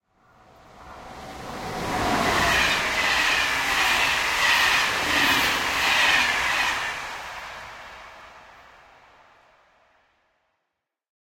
Train TGV Passing 06
Really high speed train in France, TGV ( Close take )Recorded with an EDIROL R-09
tgv, field-recording, passing, train